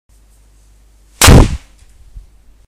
Gunshot sound made by me smacking a wet towel on a tile floor.
Floor, Smack, Tile, Towel